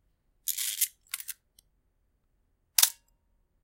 Charging and shooting with an old german Werra camera.
camera, fotografia, mechanic, old, photo, photography, shutter, slr, werra